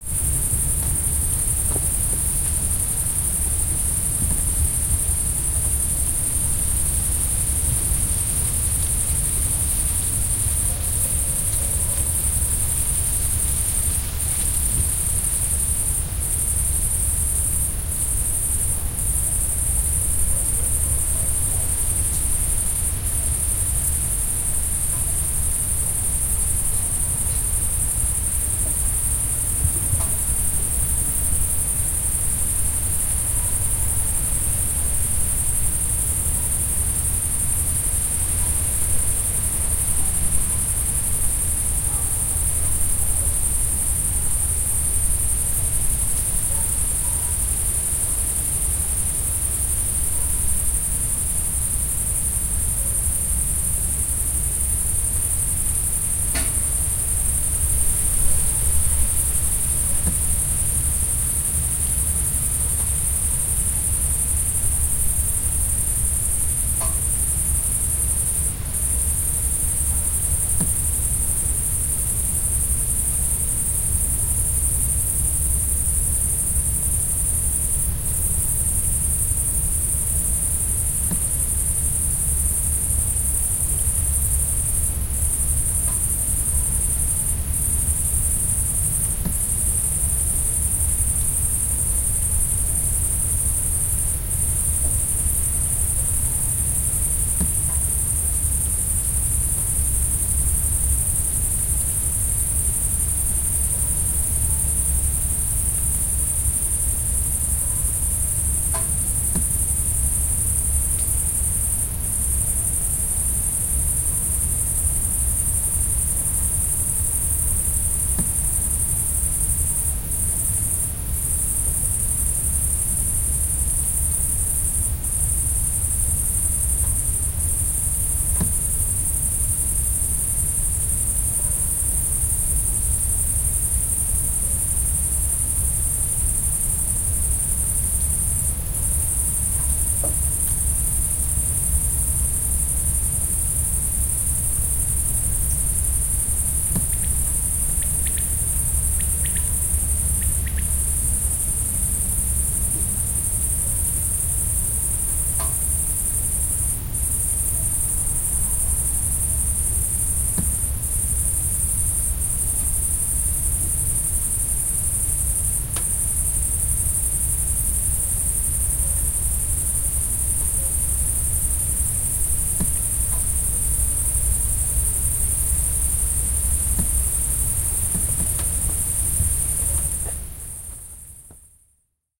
vertestolna hungary near the road 2 20080711
Recorded near the village Vértestolna on the hills. You can hear cicadas, crickets, leaves blown by the wind, our car cooling down, distant traffic and dog barking. Recorded using Rode NT4 -> custom-built Green preamp -> M-Audio MicroTrack. Added some volume, otherwise unprocessed.
traffic, hungary, crickets, leaves, wind, car-cooling-down, cicadas, night, summer